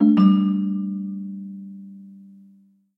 Alert Chime 1
Part of a games notification pack for correct and incorrect actions or events within the game.
bloop, effect, alert, chime, sound, application, melody, cell, incorrect, correct, click, notification, noise, blip, ambient, event, sfx, indie-game, desktop, music, ringtone, harmony, tones, bleep, videogame, game, tone, computer